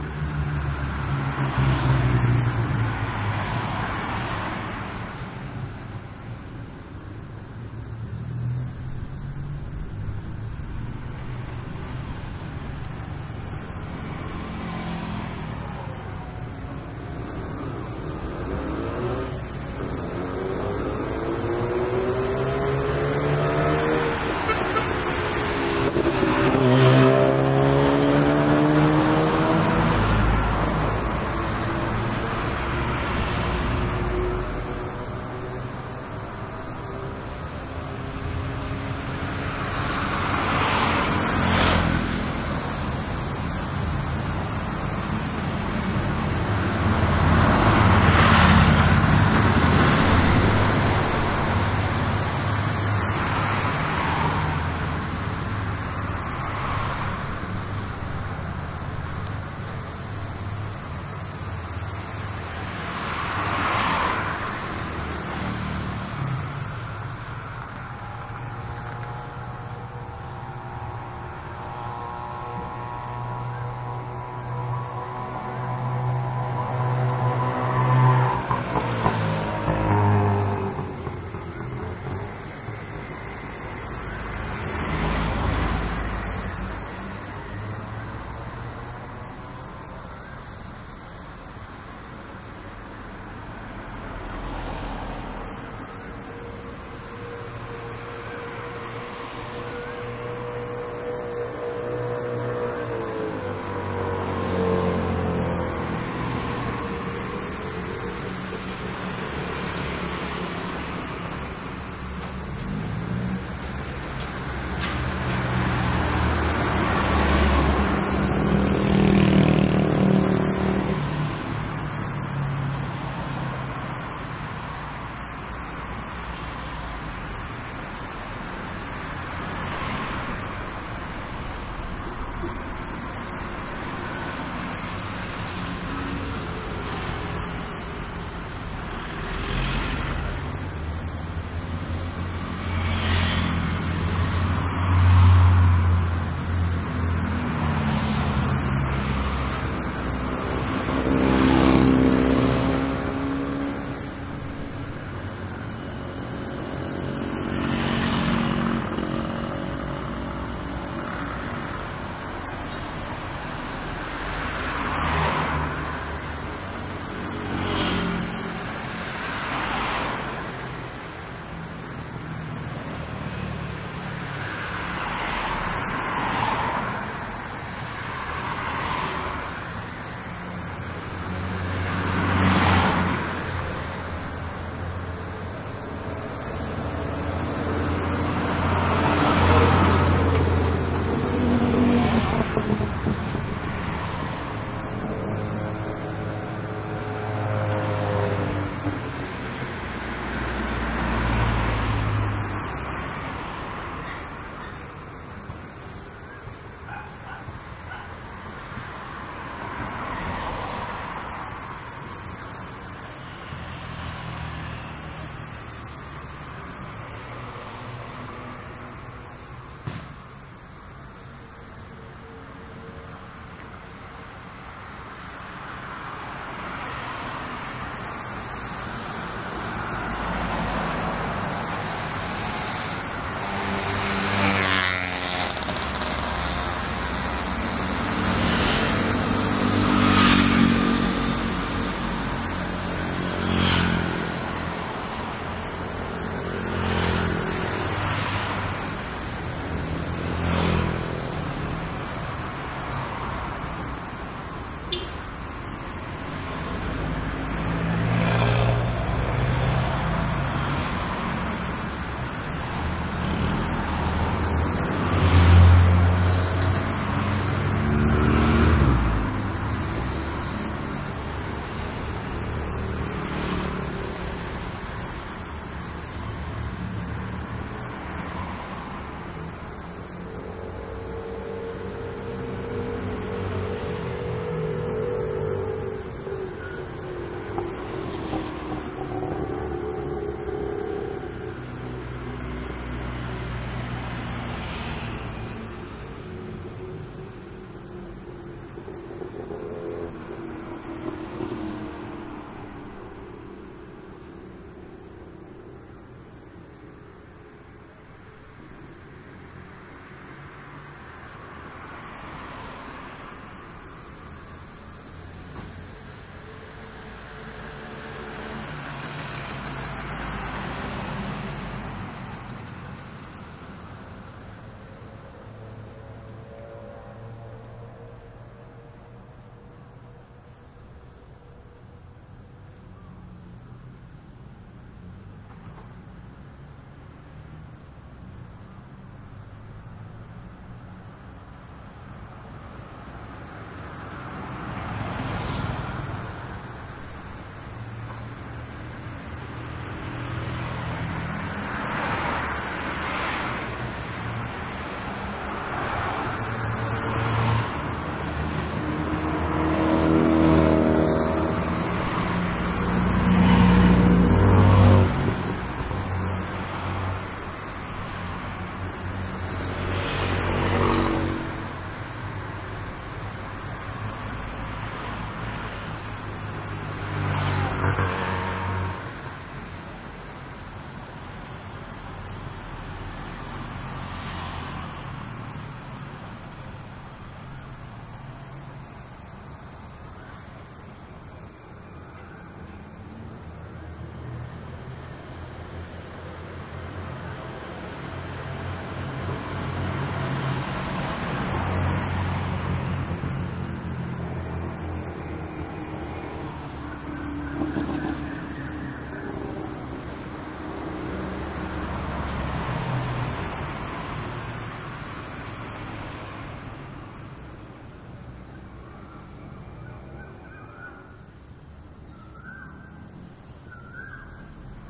Thailand Bangkok traffic med morning motorcycles from 2nd floor window tight street echo2 good left right passby detail
field-recording, Bangkok, morning, Thailand, traffic, motorcycles